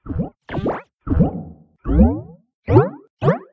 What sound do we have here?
6 sounds of "jump" . If you want to use this sound, you have to cut out the section you like.
Edited in Audacity.
Sorry, I don't remember what the original sound was :) I only know that the original sound was recorded with the mi a2 litle phone and then edited for a long time in audacity.